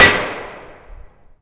One of a pack of sounds, recorded in an abandoned industrial complex.
Recorded with a Zoom H2.